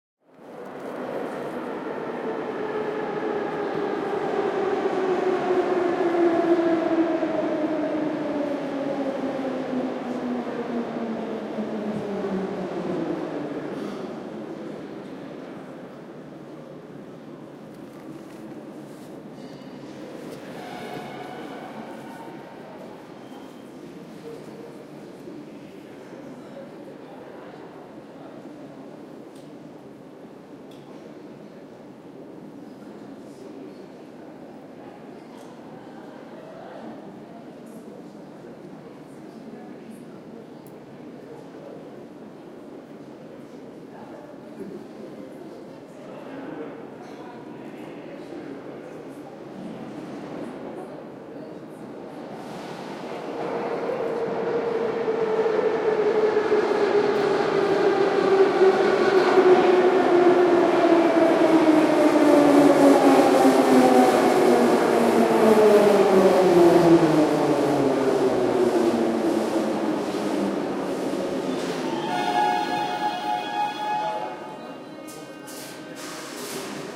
St. Petersburg Metro November 2016. Metro stopping and leaving the station. People talking. Recorded with a Zoom H1.
ambience, metro, people